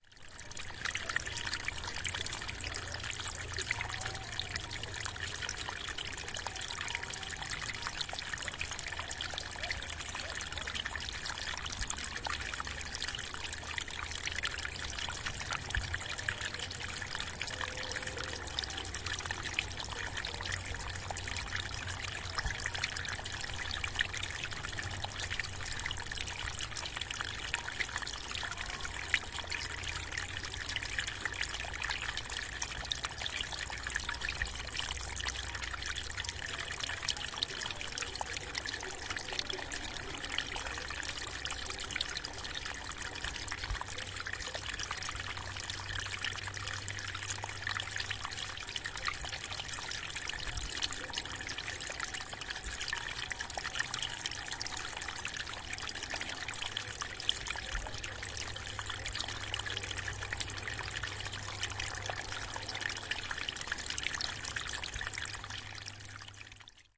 Alanis - Brook by the Chapel - Arroyo junto a Ermita de las Angustias
Date: Feb. 24, 2013
This is the sound of a brook near a Chapel in Alanis (Sevilla, Spain) called 'Ermita de las Angustias'.
Gear: Zoom H4N, windscreen
Fecha: 24 de febrero de 2013
Este es el sonido de un arroyo junto a una ermita en Alanís (Sevilla, España) llamada "Ermita de las Angustias".
Equipo: Zoom H4N, antiviento